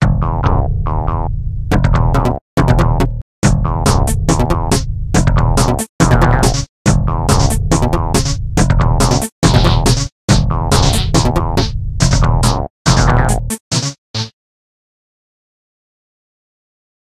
Another melody for a indie videogame or something
bso, gameboy